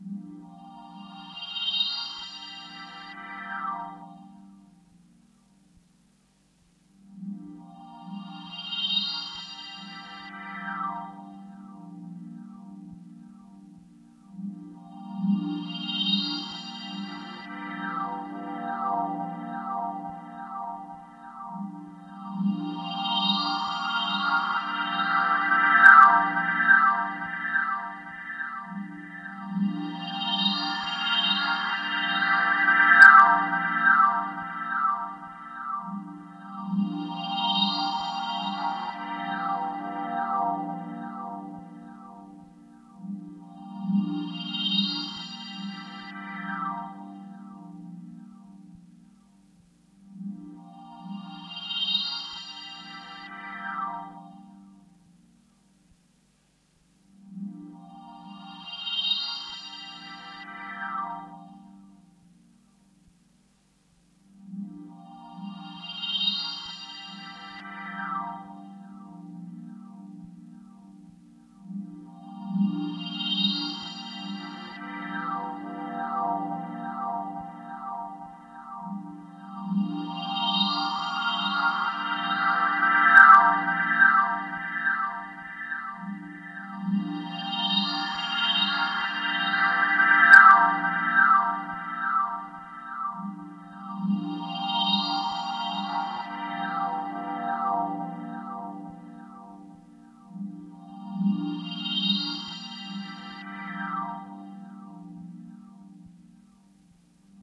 ambient key swirl
Looping, swirling, sweeping, echoey, ambient keyboard sound.
The result of an experiment one night with a Korg Z1 and a pile of VST stuff. Unfortunately I only experimented with it in one key =(
Will also loop nicely in a kind of moody, urban, dubsteppy way at around 67-70bpm.
ambient, atmosphere, dark, dreamy, dubstep, evolving, pad, smooth, swirling, swirly, urban